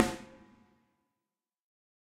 KBSD2 C42 VELOCITY5
This sample pack contains 63 stereo samples of a Ludwig Accent Combo 14x6 snare drum played by drummer Kent Breckner and recorded with a choice of seven different microphones in nine velocity layers plus a subtle spacious reverb to add depth. The microphones used were a a Josephson e22s, a Josephson C42, an Electrovoice ND868, an Audix D6, a Beyer Dynamic M69, an Audio Technica ATM-250 and an Audio Technica Pro37R. Placement of mic varied according to sensitivity and polar pattern. Preamps used were NPNG and Millennia Media and all sources were recorded directly to Pro Tools through Frontier Design Group and Digidesign converters. Final editing and processing was carried out in Cool Edit Pro. This sample pack is intended for use with software such as Drumagog or Sound Replacer.
14x6, accent, audix, beyer, breckner, combo, drum, drums, dynamic, electrovoice, josephson, kent, layer, layers, ludwig, mic, microphone, microphones, mics, multi, reverb, sample, samples, snare, stereo, technica, velocity